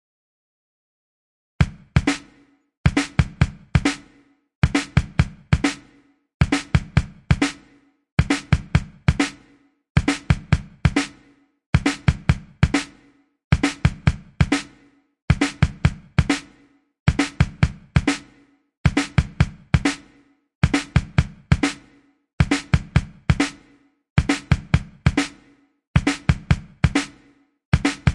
Kastimes Drum Sample 3
drum, drums, groovy